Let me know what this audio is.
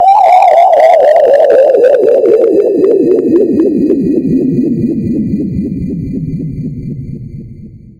drop sinus 800 100 reverb
Ideal for making house music
Created with audacity and a bunch of plugins
ping, house, fx, acid, quality